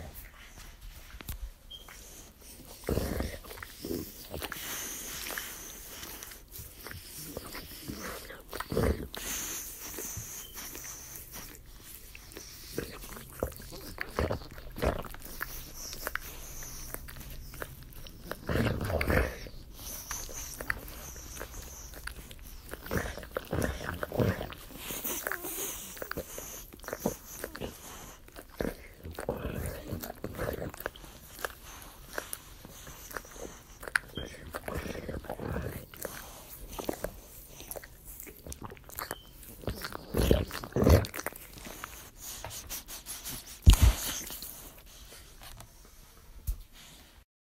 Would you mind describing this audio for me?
Bull Dog Licking Himself 1
My old english bull dog licking himself, recorded up close. Very good for gross monster sounds.